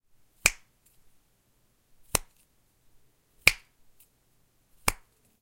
This Foley sample was recorded with a Zoom H4n, edited in Ableton Live 9 and Mastered in Studio One.
dreamlike, struck